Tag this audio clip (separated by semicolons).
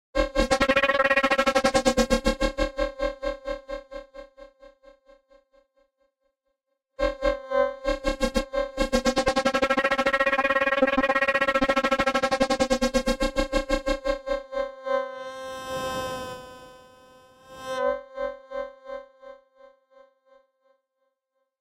fx; plugin; synth; vst